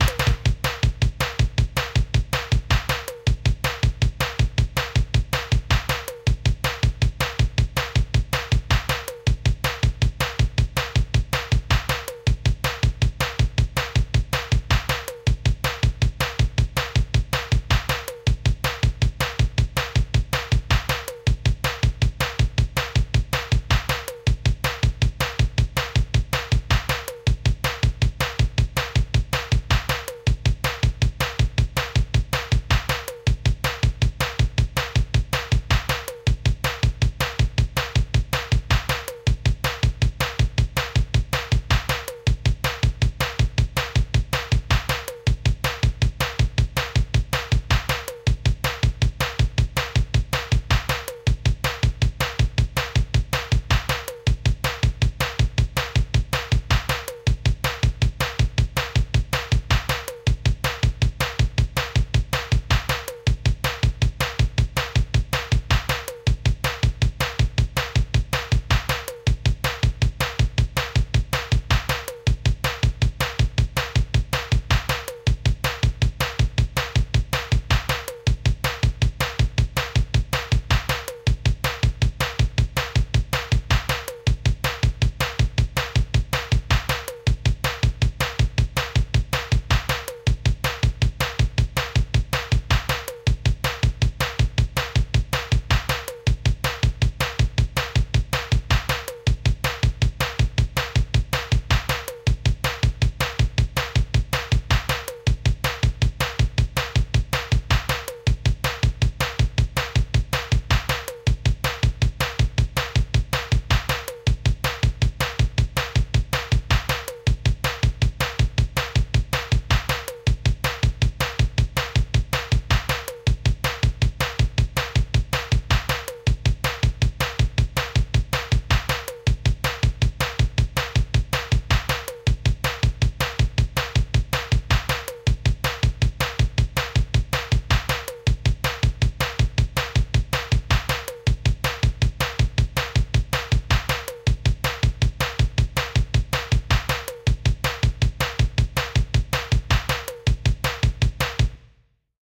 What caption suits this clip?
Dru Drumming 2 (original)
Rap, Jazz, HipHop